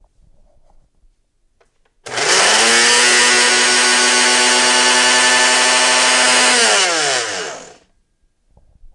Empty blender
Using a blender. Very loud
blender, loud